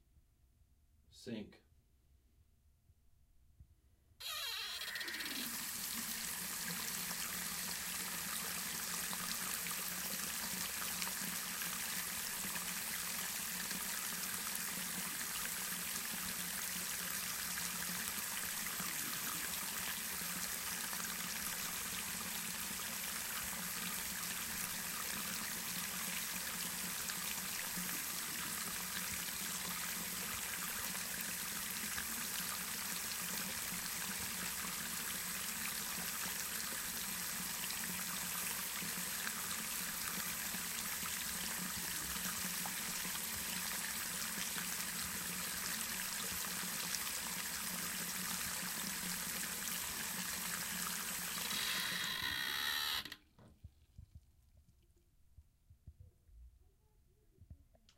sink run 3
squeaky faucet h4n & rode mic